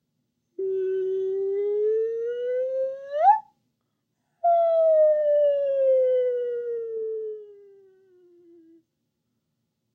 A cartoony rise and fall sound effect made with my mouth.